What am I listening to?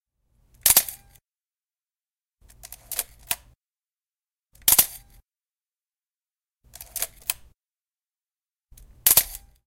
Minolta Camera Shutter
Here is a nice wind up and release shutter sound from a Minolta film camera